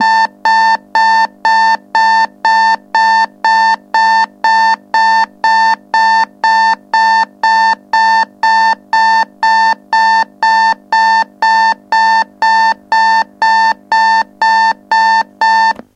Thirty-two more or less identical nasty and irritating beeps from a ?mid-80s electric alarm clock of indeterminate make. Mains hum also, plus click at end as alarm is turned off. Sony ECM-MS907 mic, MZ-R35 MiniDisk, normalized & edited in Logic Pro 7.